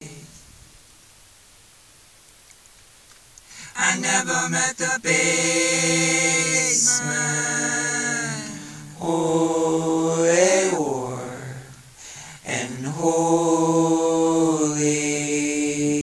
HOLY WAR Vocals
A collection of samples/loops intended for personal and commercial music production. All compositions where written and performed by Chris S. Bacon on Home Sick Recordings. Take things, shake things, make things.
acapella, acoustic-guitar, bass, beat, drum-beat, drums, Folk, free, guitar, harmony, indie, Indie-folk, loop, looping, loops, melody, original-music, percussion, piano, rock, samples, sounds, synth, vocal-loops, voice, whistle